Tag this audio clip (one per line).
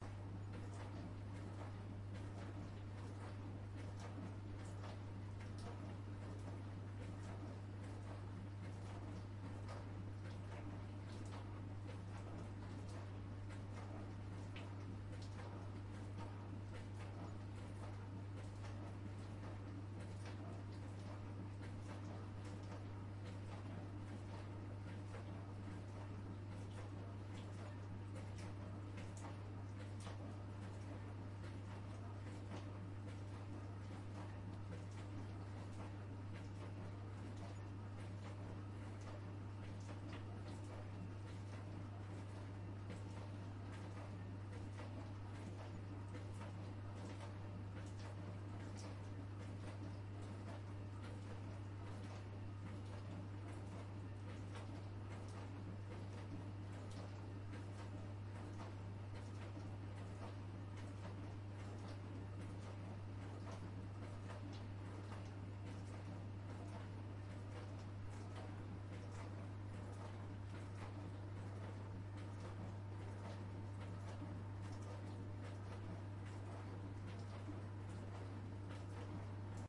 dishwasher
noise
washing